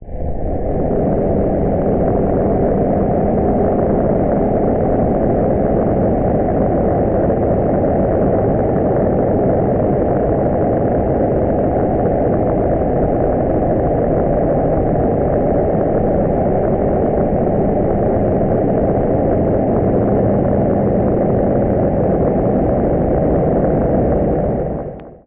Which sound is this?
A drone or a droning sound made with a short sine wave.
Use of the multi-band equalizer and a combination of
wet/dry mix and feedback(up) with Auto Phaser.